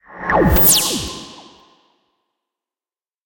A high pitched and high speed sound of something going through a time warp or portal or something.